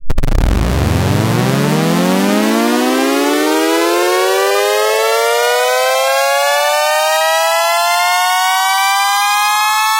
Sawtooth Motoriser
Created in LabChirp using a Sawtooth wave and frequency up with Chord
Motor, Riser